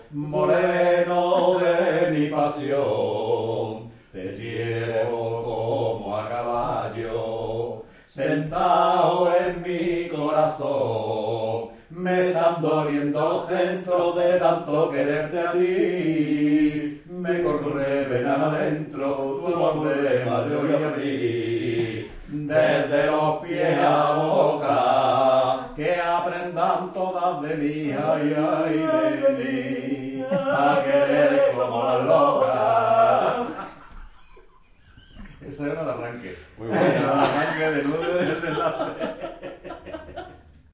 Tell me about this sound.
20111015 duo.singing
Two males in his late 40's (Antonio and Santi) sing a popular song ('copla'), in Spanish
copla, duo, male, popular, singing, song, Spanish, voice